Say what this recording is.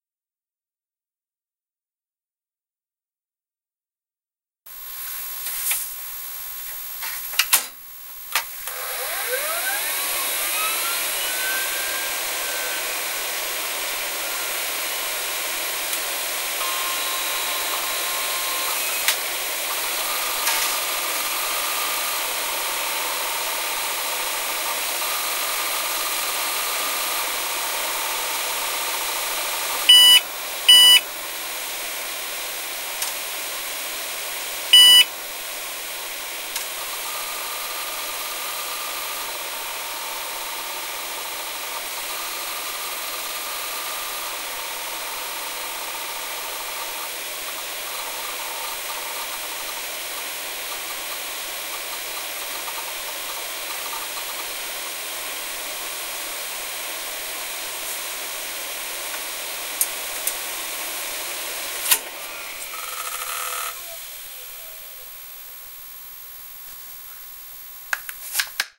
ibm personal system 2 power on self test bootstrapping power off
This is IBM personal system 2 computer powering on, tthen POST, bootstrap and lastly power off.